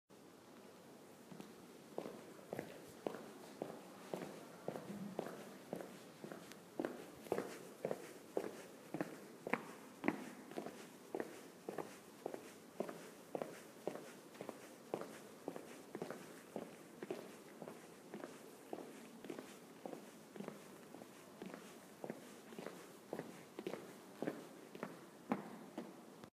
Echoey footsteps in a gallery.